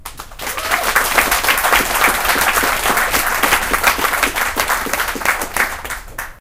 Applause for "Les Elles" for a song they sang in "Het Pleintheater" in Amsterdam on May the 27th 2007. Recorded with an Edirol R09.
audience, human, applause